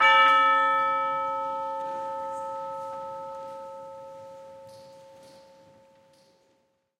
TUBULAR BELL STRIKE 003
This sample pack contains ten samples of a standard orchestral tubular bell playing the note A. This was recorded live at 3rd Avenue United Church in Saskatoon, Saskatchewan, Canada on the 27th of November 2009 by Dr. David Puls. NB: There is a live audience present and thus there are sounds of movement, coughing and so on in the background. The close mic was the front capsule of a Josephson C720 through an API 3124+ preamp whilst the more ambient partials of the source were captured with various microphones placed around the church. Recorded to an Alesis HD24 then downloaded into Pro Tools. Final edit in Cool Edit Pro.